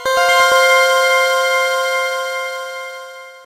Regular Game Sounds 1
You may use these sounds freely if
you think they're usefull.
I made them in Nanostudio with the Eden's synths
mostly one instrument (the Eden) multiple notes some effect
(hall i believe) sometimes and here and then multi
intstruments.
(they are very easy to make in nanostudio (=Freeware!))
I edited the mixdown afterwards with oceanaudio,
used a normalise effect for maximum DB.
If you want to use them for any production or whatever
20-02-2014